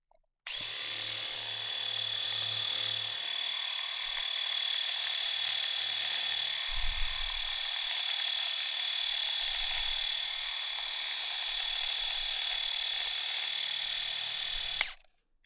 Electric razor 6 - trimmer mode on beard
A recording of an electric razor (see title for specific type of razor).
Recorded on july 19th 2018 with a RØDE NT2-A.
beard, electric, electricrazor, Razor, razorblade, shaven, shaver, shaving